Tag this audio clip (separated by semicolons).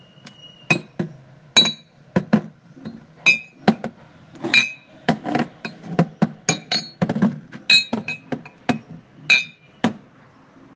crystal; glass; glasses; kitchen